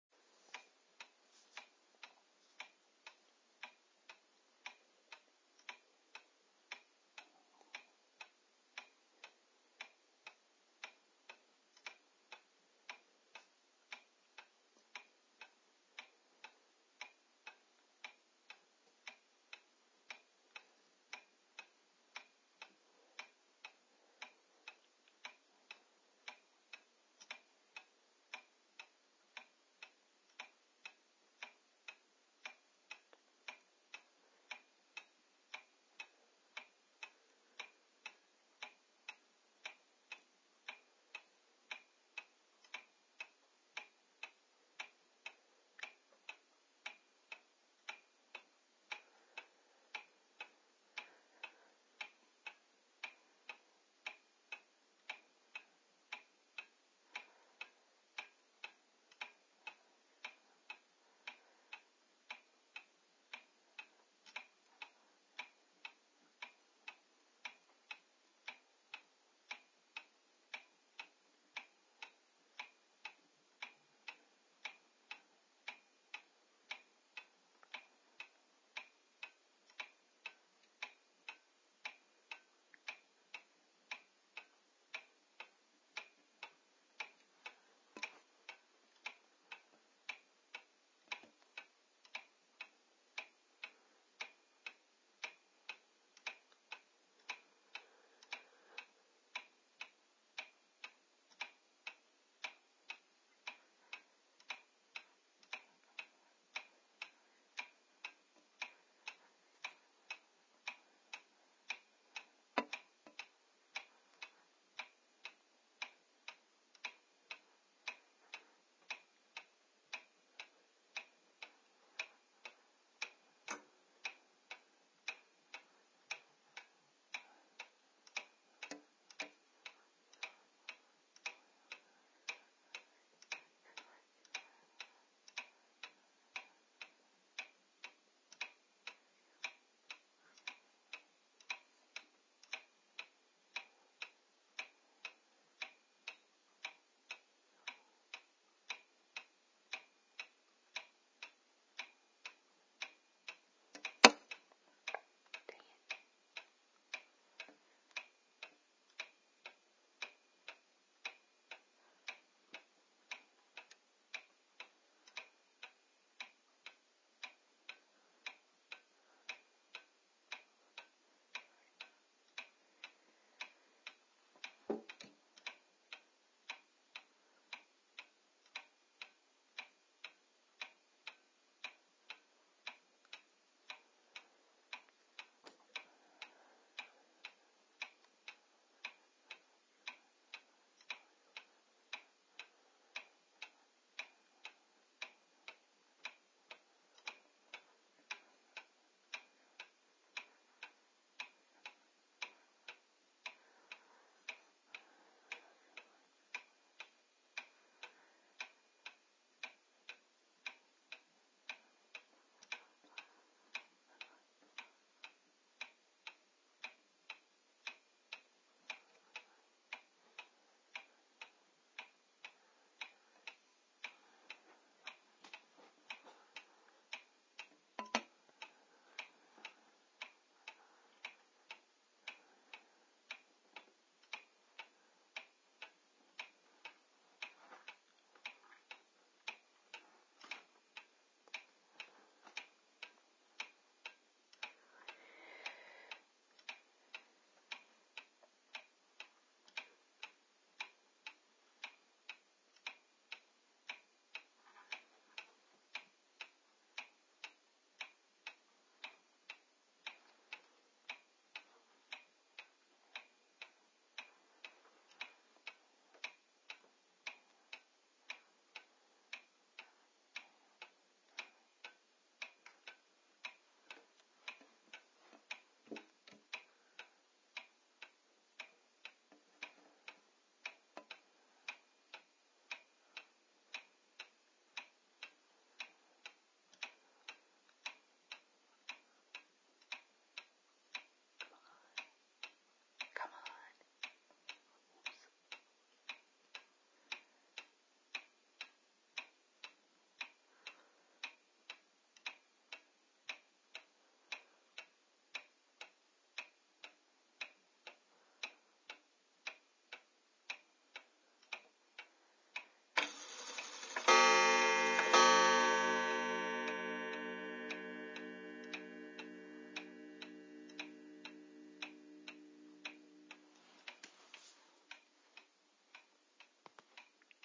Clock Ticking And Striking
This is a recording of my old antique clock. I recorded this using an iPad microphone so it may not be best quality. Near the end of the recording, it strikes 2 o'clock. If you hear some bump or tapping sound, that is because my around moved around and bump the door of the clock.
Clock, chimes, time